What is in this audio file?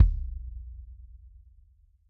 JP Kick 1 full release
Great sounding drums recorded in my home studio.